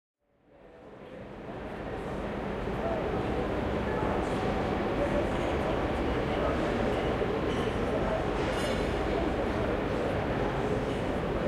808 St Pancras ambience 3
ambience, atmosphere, field-recording, london, platform, station, st-pancras, train